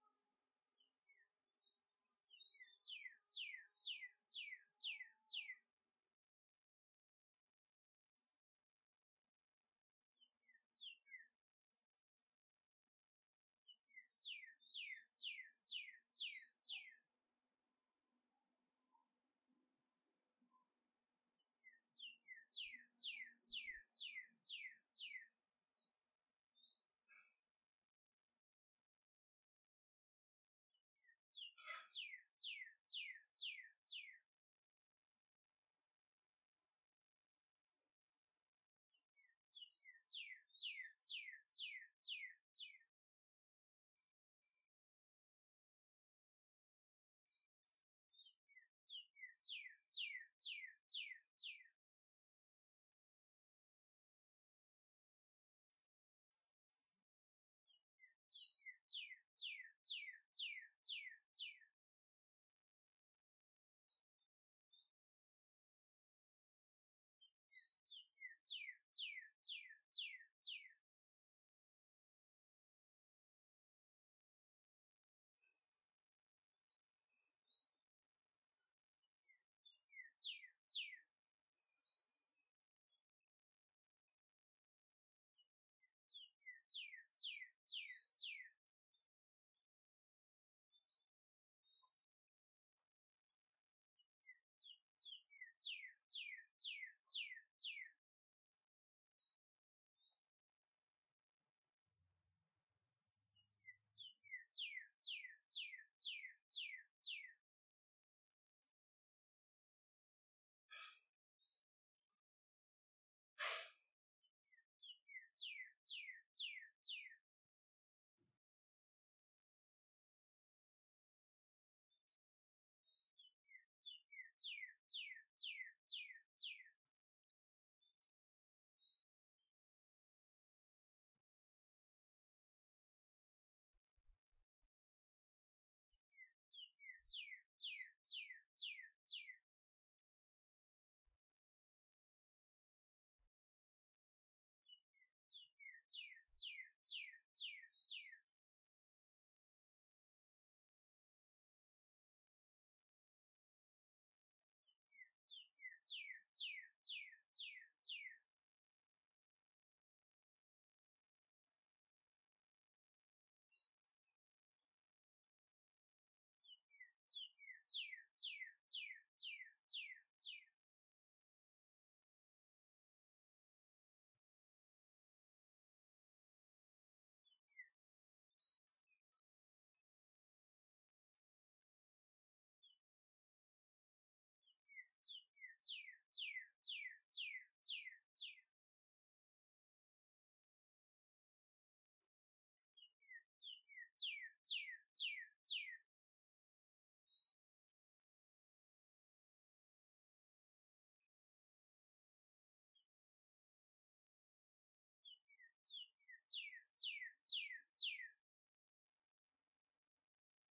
sounds nature birds - homemade
Birds! Quiet and calming, one specific call dominates.